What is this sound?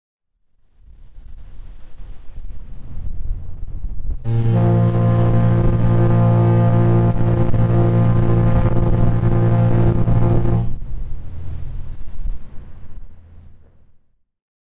Coho fog horn
This is the sound of the fog horn from the M/V Coho. The Coho is a passenger and vehicle ferry owned and operated by Black Ball Line. Black Ball's only ferry, the Coho carries passengers and cars, trucks, semi-trailers, bicycles, etc. between Victoria, British Columbia, Canada and Port Angeles, Washington, United States.